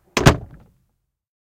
Horsewagon door
Horsewagon from 18th century
closing, door, Horsewagon, wooden